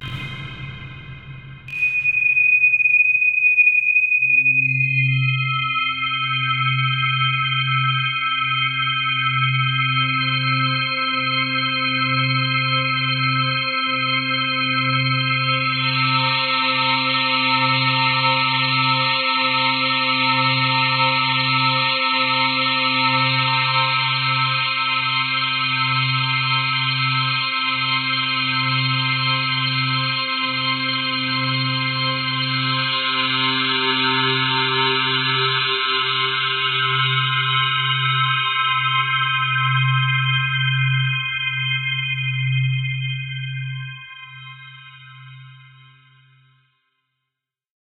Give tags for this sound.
MeldaProduction
Oscillator
Reverb
Space
Synthesizer

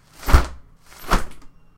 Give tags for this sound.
flutter
sound
dragon

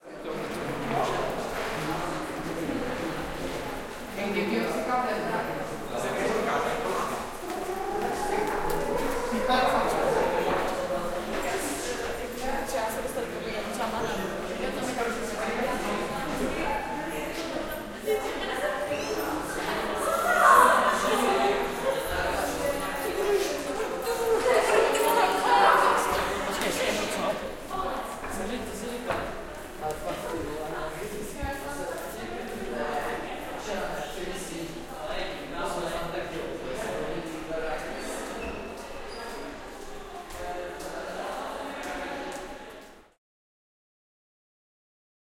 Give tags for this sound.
CZ
Czech
Pansk
Panska

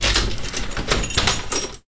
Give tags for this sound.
component
jingle
unlock

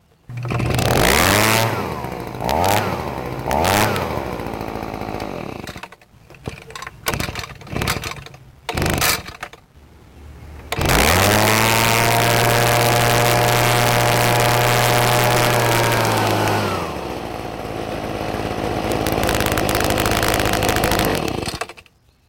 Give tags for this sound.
blower
Echo
engine
gas
leaf
starting

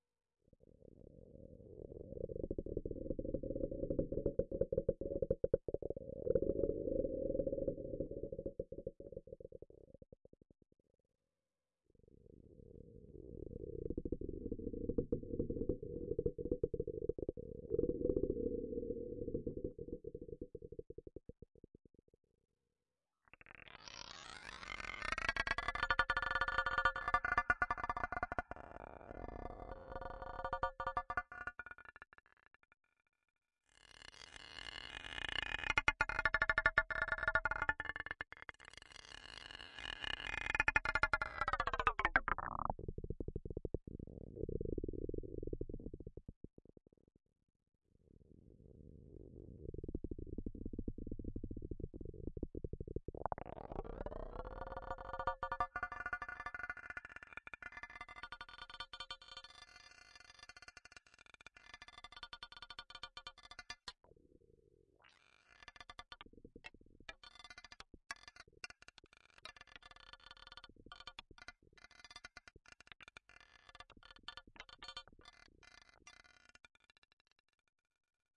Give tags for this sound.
Space
Mechanical
Spacecraft
strange
sounddesign
future
digital
Noise
Stone
Alien
sound-design
weird
electric
fx
sound
design
Electronic
peb
freaky
lo-fi
sfx
abstract
UFO
loop
soundeffect
sci-fi
Futuristic
Futuristic-Machines
effect

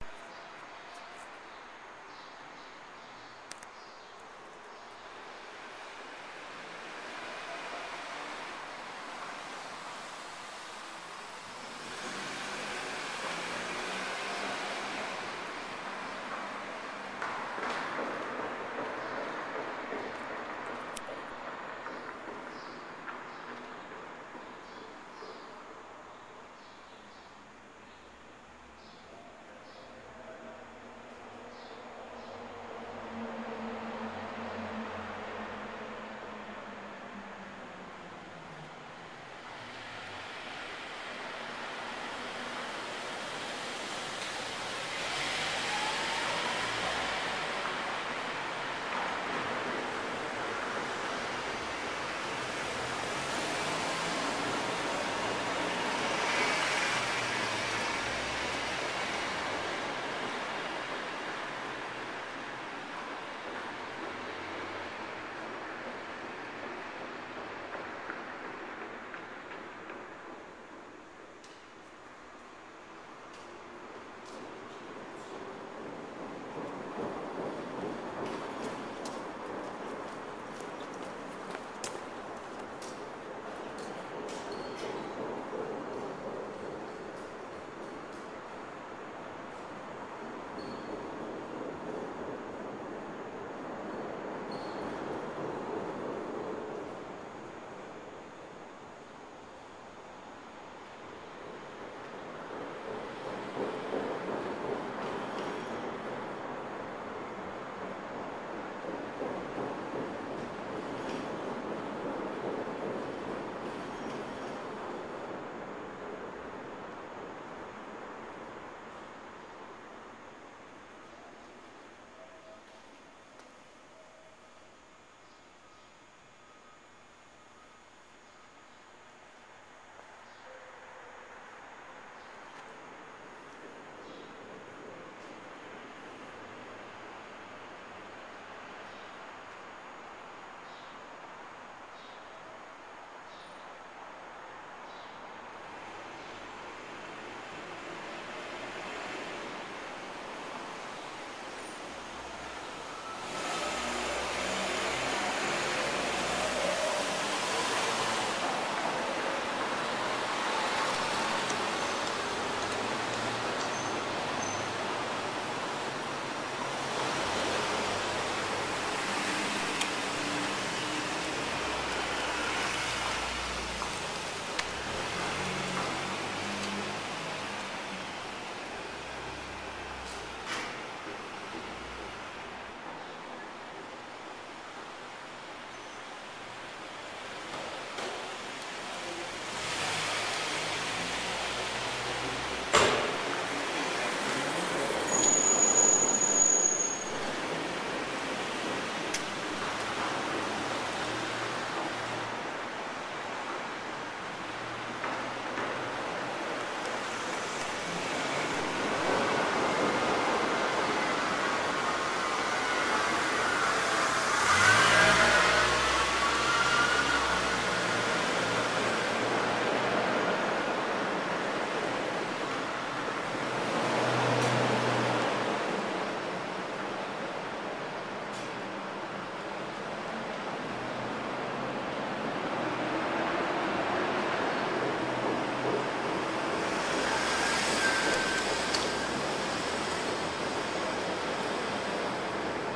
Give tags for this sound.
garage
open

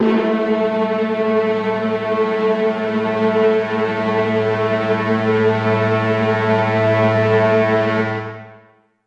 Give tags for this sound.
Orchestra
Spook

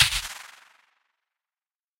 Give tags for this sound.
drum
experimental
hits
idm
kit
noise
samples
sounds
techno

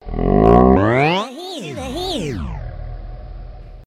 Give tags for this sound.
effects
vocal
female
girl
fx
voice
speech

